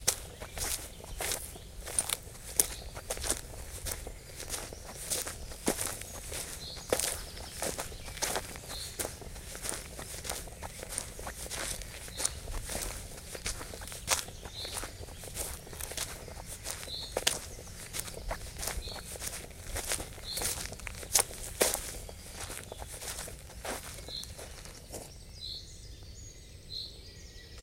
Walking through the forest over a sand path, trousers cloth rubbing on each other. Vivanco EM35 into Marantz PMD671.